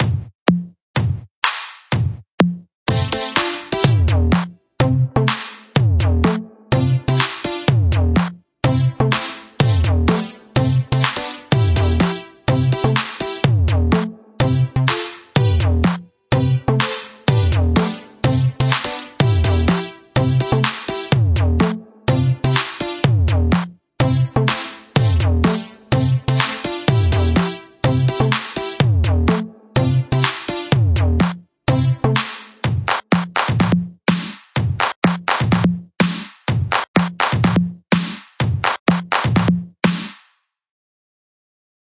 Retro Pop Beat
80s Dance Pop
125bpm Key F
Synth Drums Keyboard
41 seconds
Upbeat Tempo
Not required but if you use this in a project I would love to know! Please send me a link.